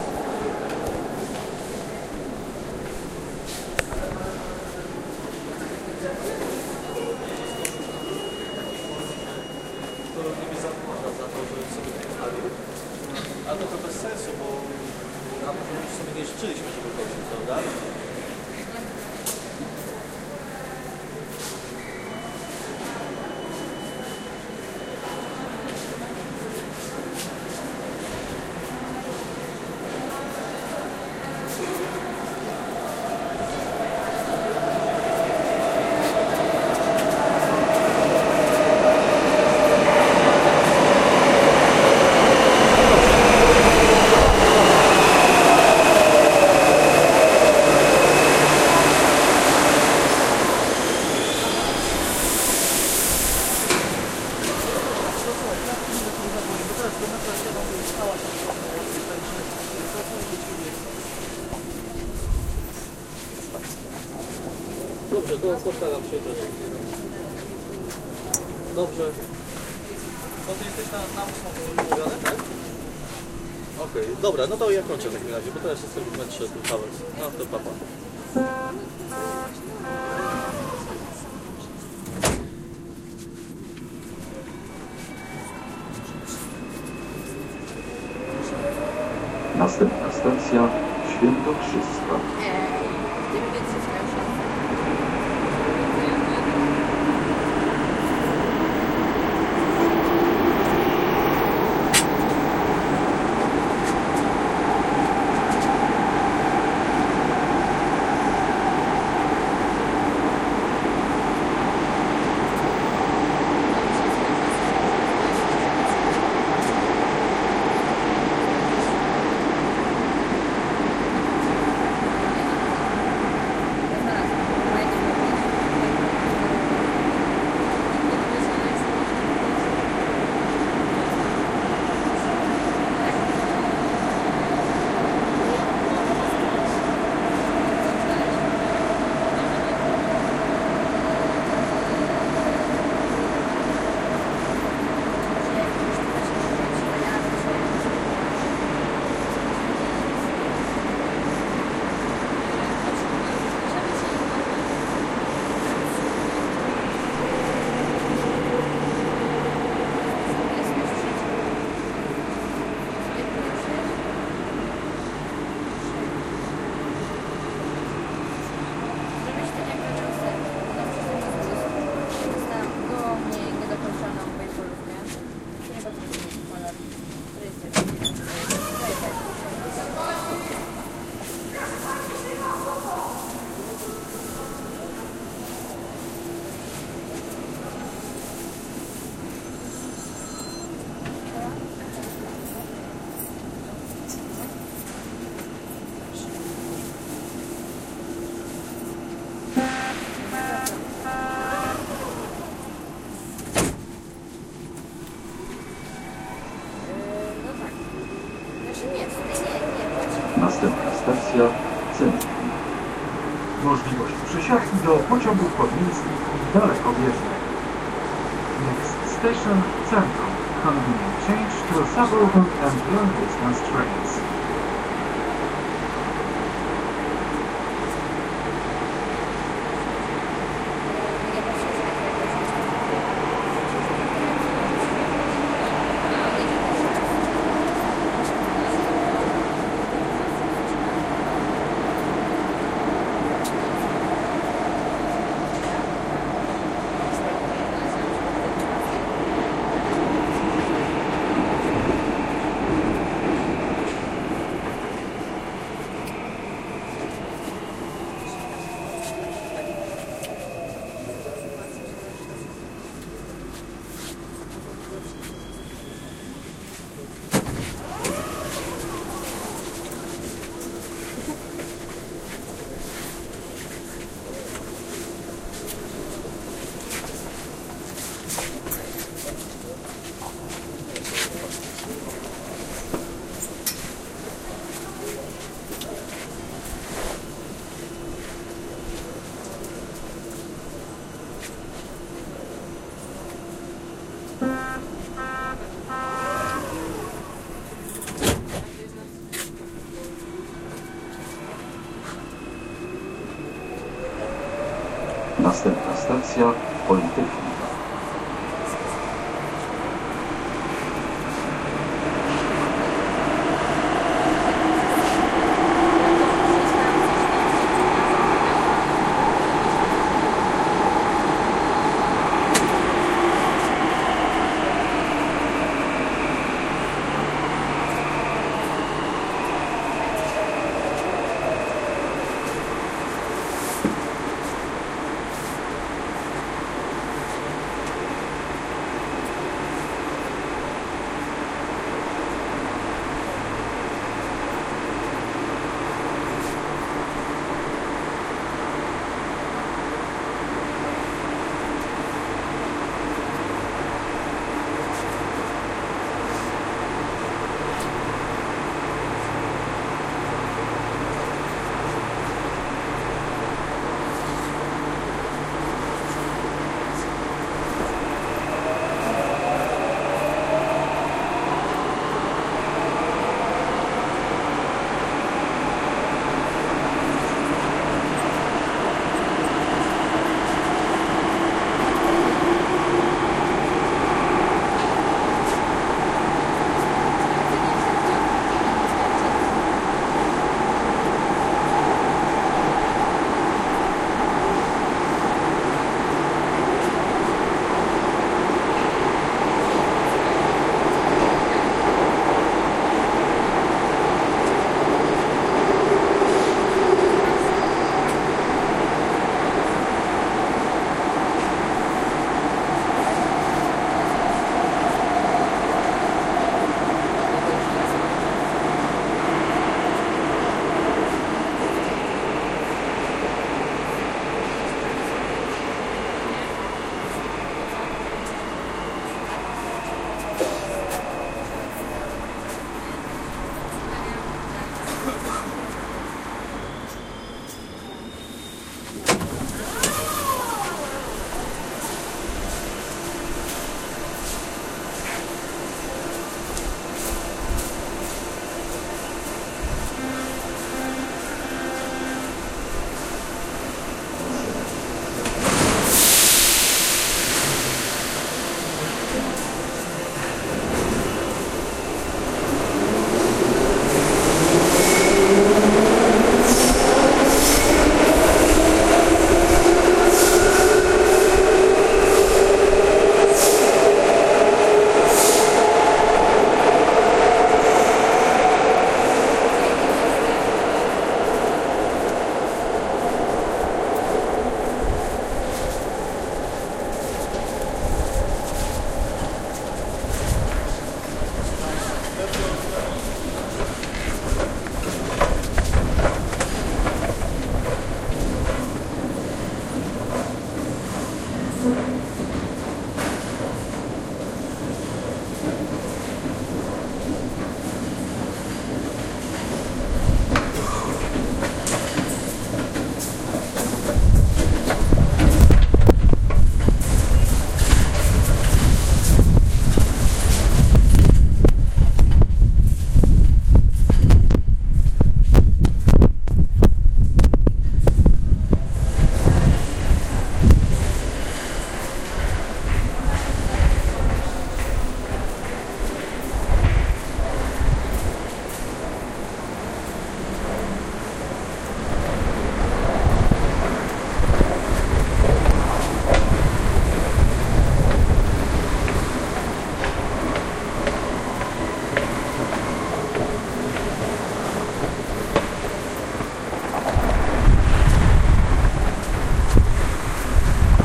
ENG: Recording sample recorder Olympus DM-3. Recorded during a trip between the four subway stations in Warsaw Arsenal, Swietokrzyska, Centrum, Politechnika. at the end of the recording you can hear noise associated with the blowing of the wind.
PL: Nagranie próbki dyktafonu DM-3 Olympus. Nagrane podczas podróży pomiędzy czterema stacjami metra w Warszawie: Arsenał, Świętokrzyska, Centrum, Politechnika. pod koniec nagrania słychać zakłócenia związane z wiejącym wiatrem